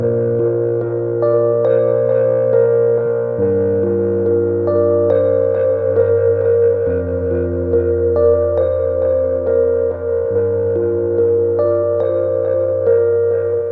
rhodes loop 1
This is a 70 BPM, 4-bar loop played on a Rhodes Mark II electric piano. Recorded directly from the piano into a Roland Space Echo into a Zoom H4. Trimmed using Spark XL to create a seamless loop.
echo, keyboard, loop, piano, rhodes, sadness